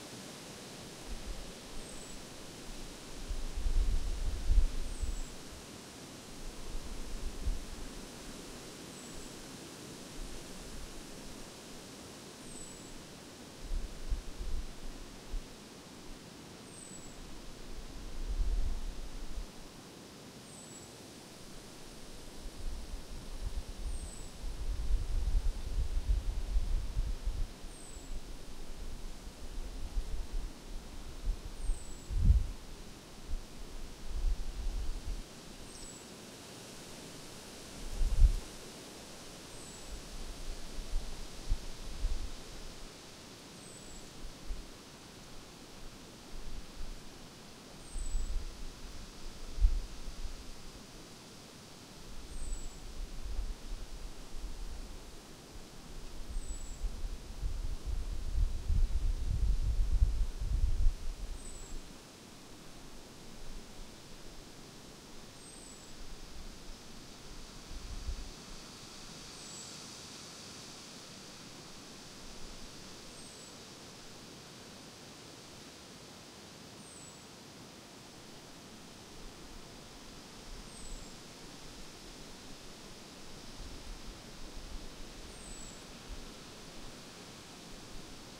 A small field recording of birds and wind outside in the middle of the day. Recorded with Zoom H1n.